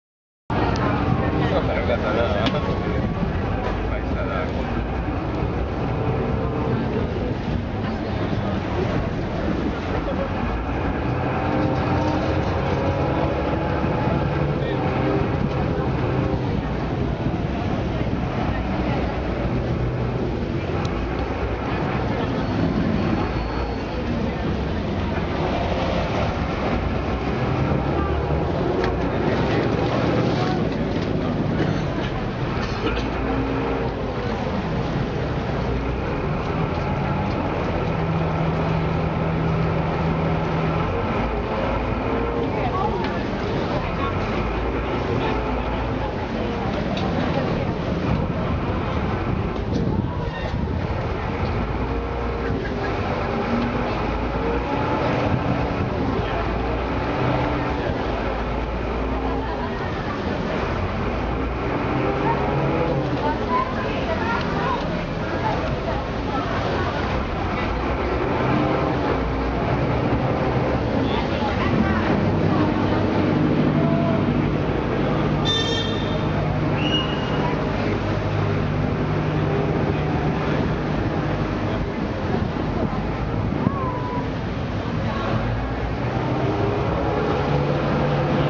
Field recordings extracted from videos I took while travelling on a vaporetto (small public transport canal boat) along the Grand Canal. You can hear the water, the engine revving as the boat criss-crosses the canal from one stop to the next and the(mostly English)chatter of other passengers on the boat. On "Venice2" horns are blown by other vessels and someone says at the end "I'm getting off the boat". I have joined 2 tracks on this one and there is a small gap, but with the right equipment that can be edited out. All in all very evocative of the busy atmosphere in Venice, even in March (2012).
ambience, boat-engine, busy-river, chatter, engine-noise, Grand-Canal, river-traffic, tourists, vaporetto, Venice-Italy